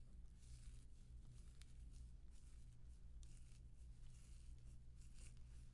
movement leaves sound